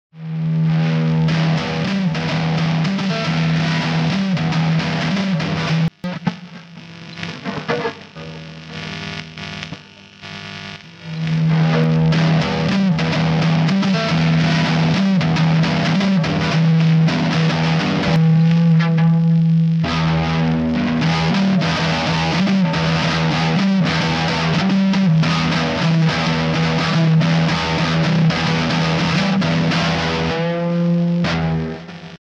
the tongue of dog number 2
radio, wave, future, SUN, space, star, sounds